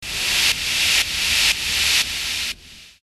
bumbling around with the KC2
electric, kaossilator2, sound